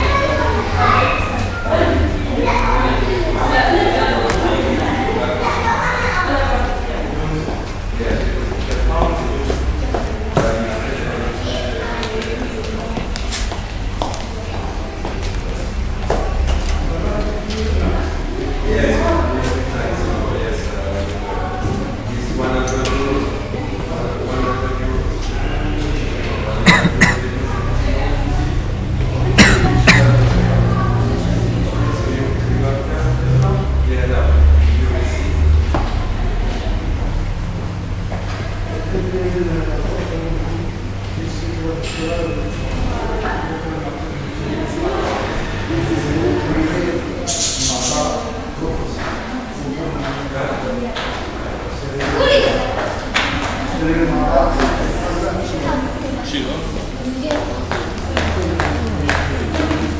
A field-recording a friend made in a hotel lobby in Paris.
children, field-recording, footsteps, hotel, lobby, noise, paris, people, reverb